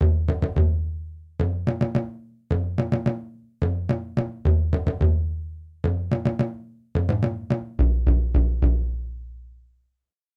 Krucifix Productions 2018 African Drum Beat 2
Just an African sounding drum loop that I composed
African, drumbeat, drums, loop, percussion, percussion-loop, rhythm